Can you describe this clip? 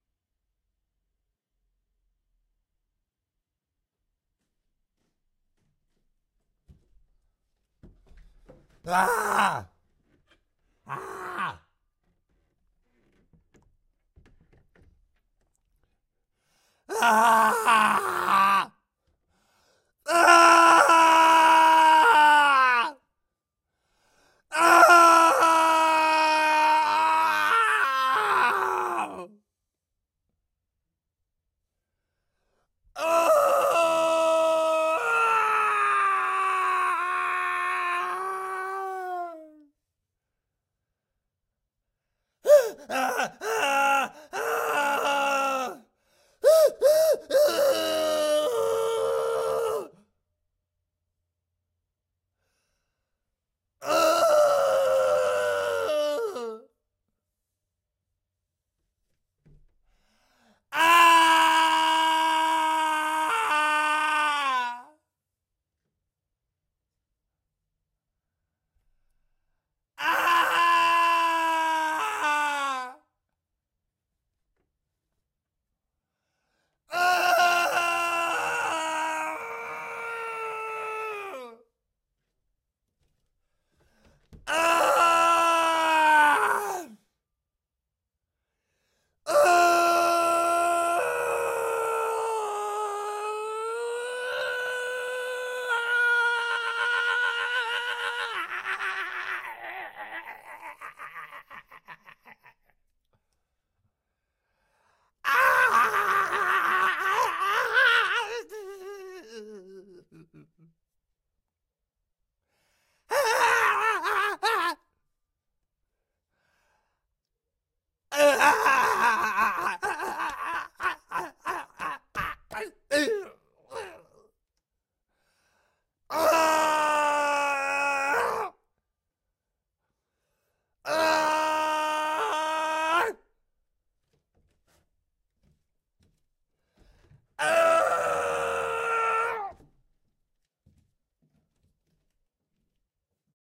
Male screaming close to the mic
Own personal scream I did for my movie Rain Machine
It's magical to add secretly your own voice to an actor
scream, pain, fall, falling, screak, yell, squeal, fear, torment, agony